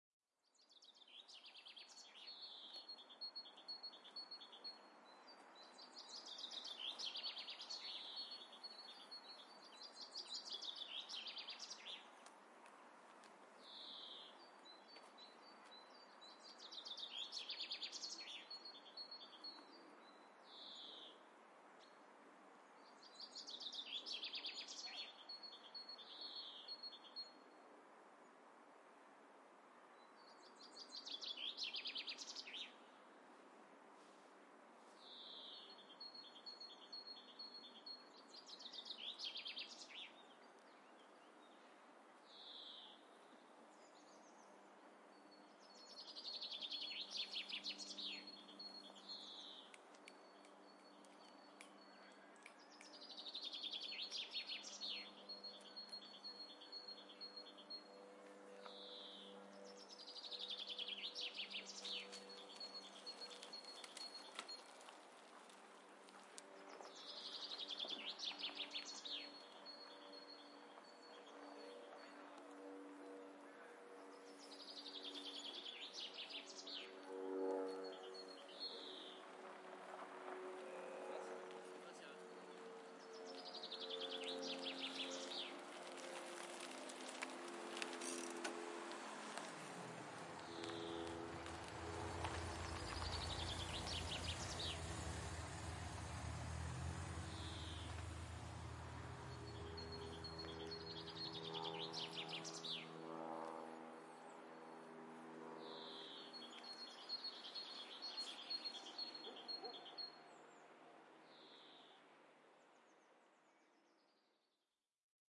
Morning view from the banks of the Saône river
Sample made in april 2018, during participatory art workshops of field-recording and sound design at La Passerelle library Le Trait d'Union youth center, France.
Sample 1
Cash register with multiple delays.
Sample 2
Piece on drum with slow audio.
Sample 3
Ride cymbal with reverb.
Sample 4
Torn paper with bitcrushing.
Sample 5
Trash bin percussion with reverb.
Sample 6
Quantized trash bin rythm.
Sample 7
Percussion on metal and shimmer
Landscape 1
Morning view from the banks of the Saone, around Trévoux bridge, France.
Landscape 2
Afternoon carnival scene in Reyrieux, France.